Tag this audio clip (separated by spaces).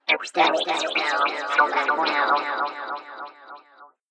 creep future shift quote strange weird sci-fi bizarre reverb delay abstract pitch effect creepy noise electronic digital freaky echo experimental